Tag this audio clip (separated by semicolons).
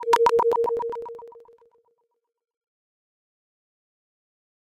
8bit; animation; arcade; cartoon; film; game; games; magic; movie; nintendo; retro; video; video-game